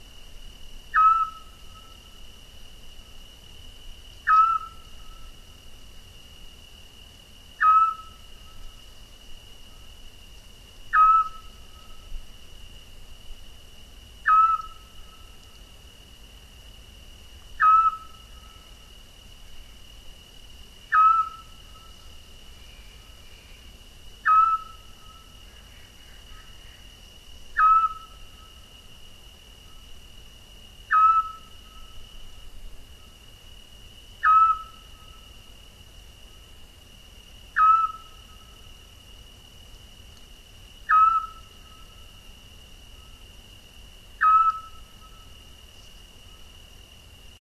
A mono recording of a Scops Owl in a Eucalyptus tree next to our house in Andalucia, Spain.
scops, owls, birdsong, bird-calls, scops-owl, owls-in-spain